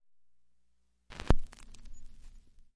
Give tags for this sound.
vinyl
record
surface-noise
turntable
pop
LP

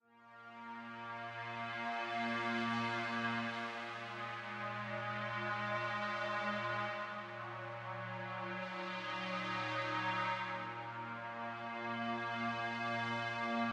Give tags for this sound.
awesome
8-bit
sounds
drums
synthesizer
video
samples
game
sample
melody
hit
drum
loops
music
chords
synth
digital
loop

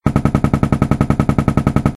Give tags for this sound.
Automatic
Gun-Shot
Light-Machine-Gun

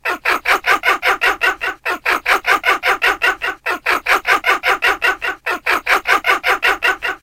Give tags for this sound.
laughing
crazy
horror-fx
ghost
drama
monster
horror
laugh
suspense
horror-effects
terrifying
thrill
terror

